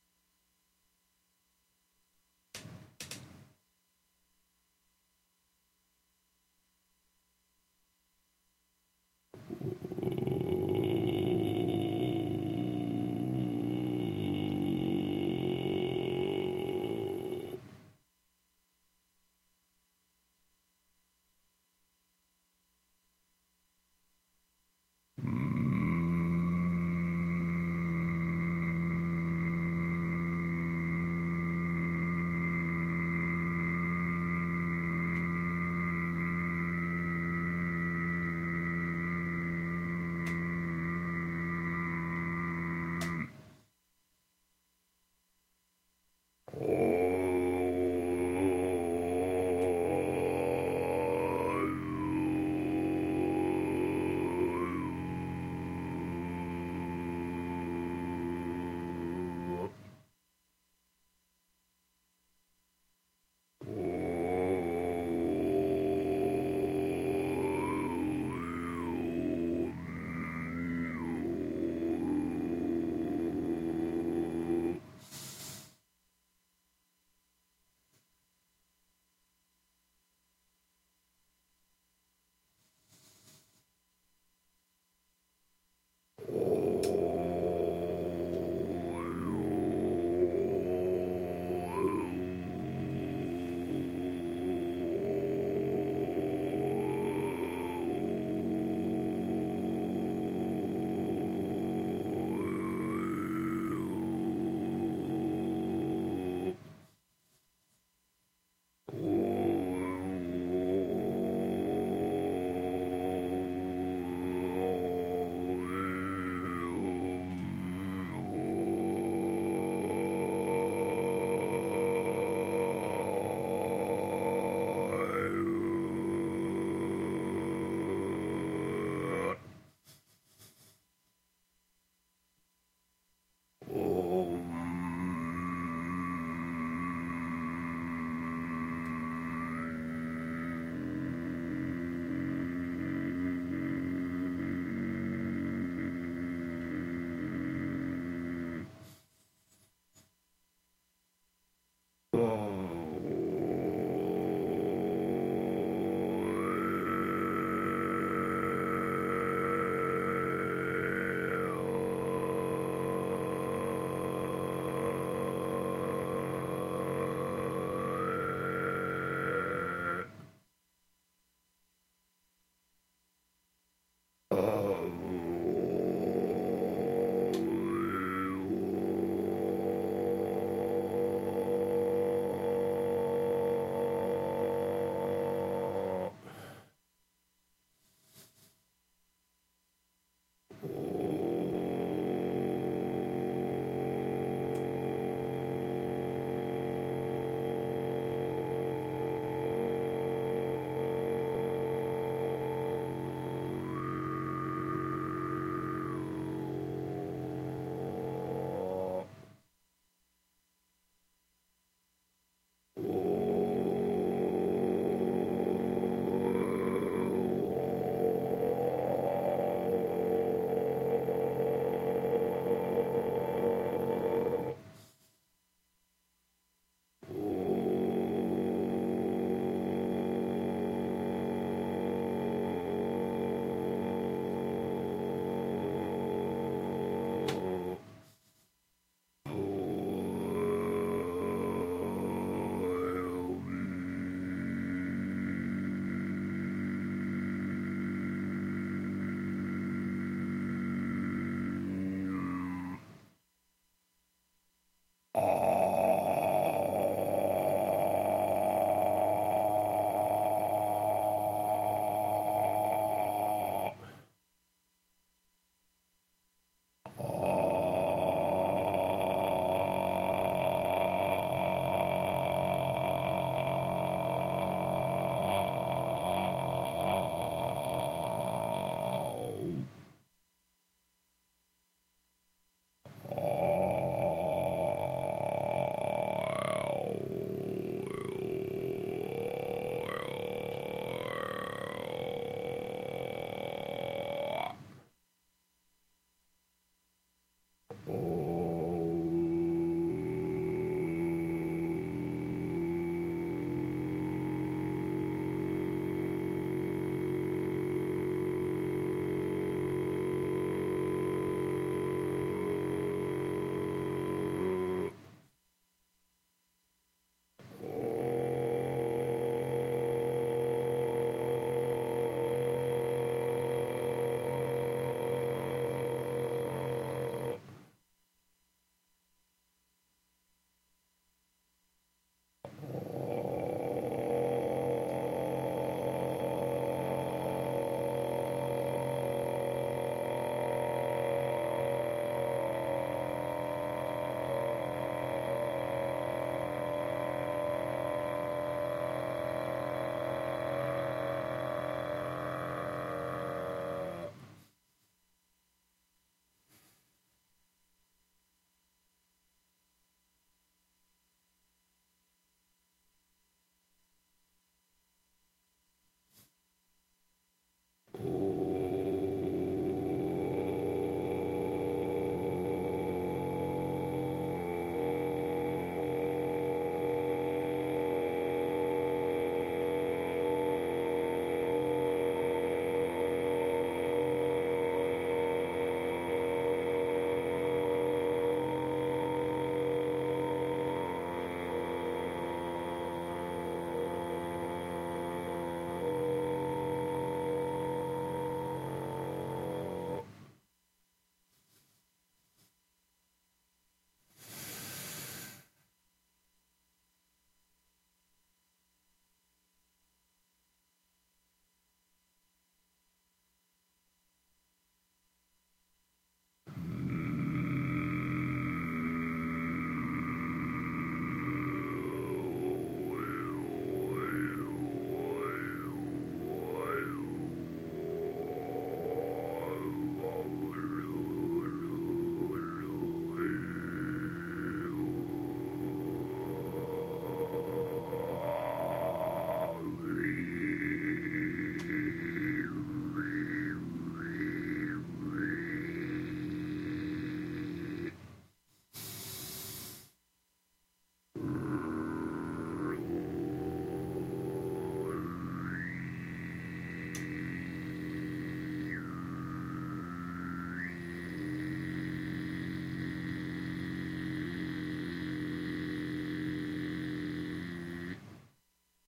Throat Chakrah Meditation Recording
Just a raw recording I did of myself doing a short throat chakrah meditation.